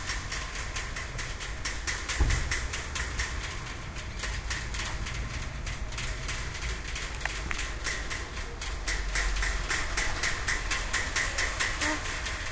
SonicSnap SASP PauJordiJoanMarc
Field recordings from Santa Anna school (Barcelona) and its surroundings, made by the students of 5th and 6th grade.
6th-grade, cityrings, sonicsnaps, spain